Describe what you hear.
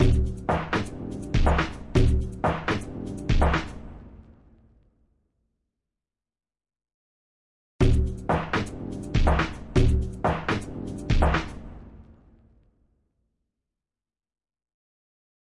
Tri Hop Rhythme
Some cool sounding stuff at 123 BPM.